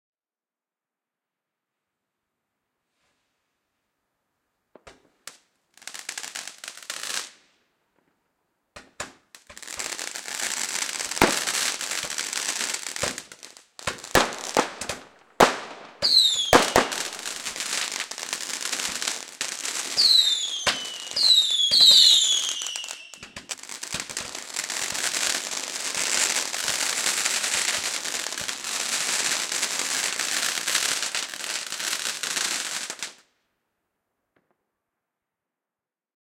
56 shot candle barrage 1, 5th november 2012
This is a recording of a 56 shot candle barrage garden firework being set off on bonfire night (november 5th) 2012.
The firework contained shells which crackle, bang, and whistle.
Recording date: 2012/11/05
Recording location: my back garden, crosby, liverpool, merseyside, UK.
Recording equipment: 2X Shore SM58 dynamic cardioid microphones arranged in a spaced pair, into a Behringer XENYX QX mixer, into the olympus DM5 digital recorder.